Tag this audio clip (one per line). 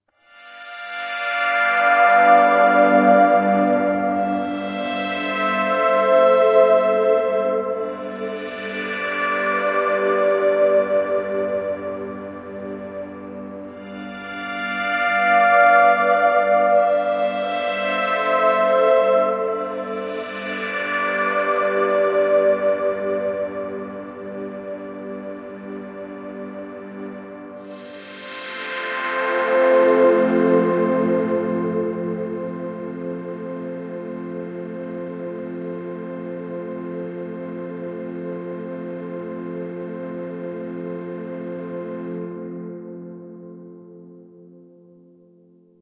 test
synth
sound
vst
loop